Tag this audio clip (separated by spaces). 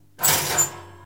toaster toast bread